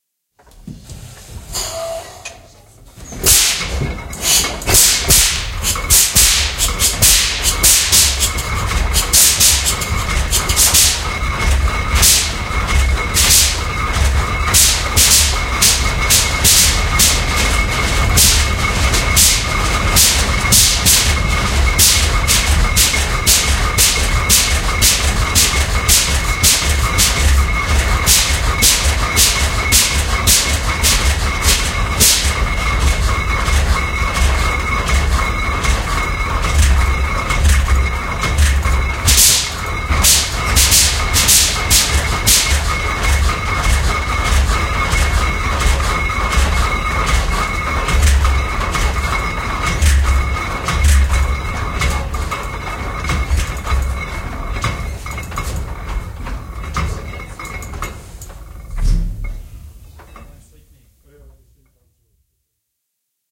Sounds from the engine of a Hart Parr 30-60 "Old Reliable",
recorded on February, 19th 2015,
at Traktormuseum in Uhldingen at Lake Constance / Germany
Hart Parr 30-60 facts:
Year: between 1907-1918
Engine: 2 Cylinder, 38600ccm, 30/60 Horsepower
Weight: 9117kg